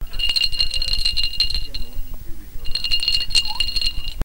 Ice rattling in a shaken glass, recorded with a stereo microphone